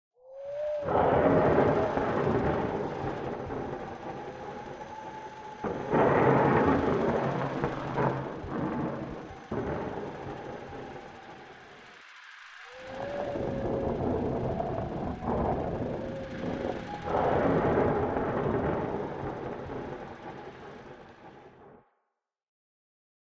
This is a sound I created by using three different things. I recorded my bathroom fan and altered the pitch, added a few cents and overlayed them at 50%, and added a band-pass filter. I also recorded batteries rolling across the bottom of a drawer, lowered the pitch a bit, and added a high pass filter. And then lastly I added some wind that I created by blowing into my hands. That I did not adjust at all. It sounds JUST like a thunderstorm.
thunder, weather